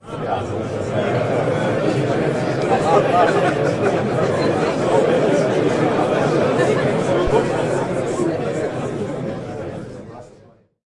Crowd mumbling at Talk & Play event in Berlin.
Thank you and enjoy the sound!